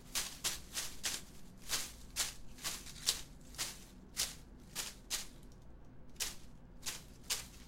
Steps on grass.
grass, steps, ground, foley